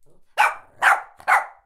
Shih Tzu dog, barking